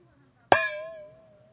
Dog bowl half filled with water
half-filled water bowl